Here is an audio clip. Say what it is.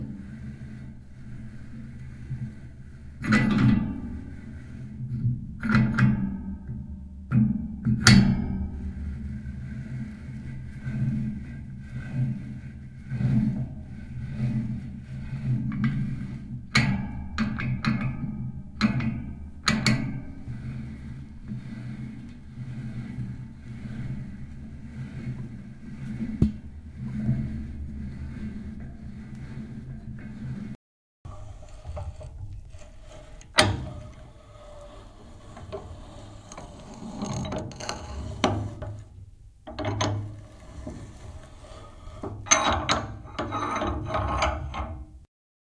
Turning a vise in a workshop.
de, r26, R, metal, vise, ntg3, Roland, industrial